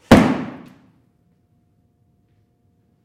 box falls (2)
Large empty cardboard box falls near microphone on concrete floor.
Recorded with AKG condenser microphone M-Audio Delta AP
box, cardboard, crash, foley, soundeffect, thud